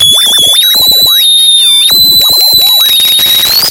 Fake short-wave radio interference produced by the Mute-Synth